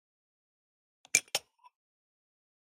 Putting glasses separately
glass, glasses, separately